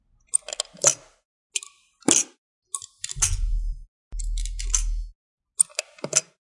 Elevator Sounds - Button Clicks
Elevator buttons being pressed, small assortment